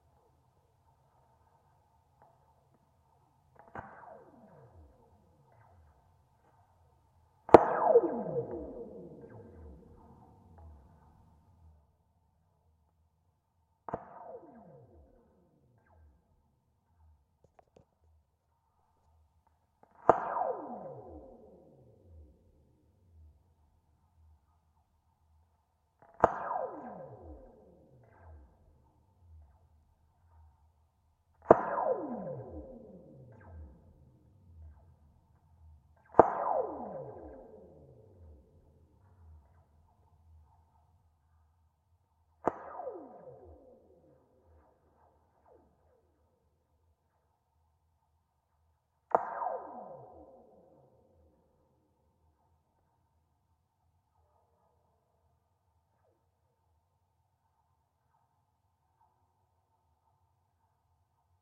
WNIU Radio 04
Contact mic recording of radio tower support cables (former DeKalb, Illinois, USA station WNIU). Characteristic "ray gun" sound when wire is struck.
radio, Schertler, Illinois, tower, sony-pcm-d50, DeKalb, sample, DYN-E-SET, since-demolished, stays, contact, cable, WNIU, wikiGong, contact-microphone, field-recording, NIU